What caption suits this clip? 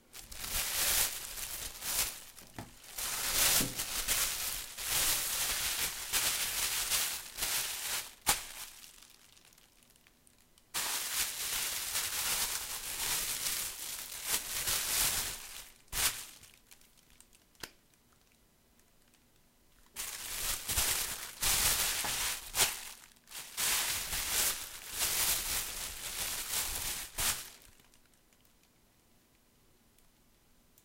put thing on plastic and remove
Plastic bag moved, with a thing put in it and removed
Recorded with a TBone SC 440
plastic, thing